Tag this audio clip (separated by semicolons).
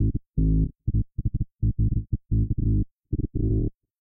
digital; electronic; fx; glitch; glitchy; sfx; Short